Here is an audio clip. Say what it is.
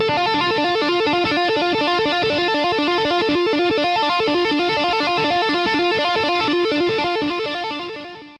finger tapping recorded with audacity, a jackson dinky tuned in drop C, and a Line 6 Pod UX1.